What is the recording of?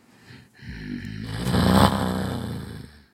zombie, undead, moan, breath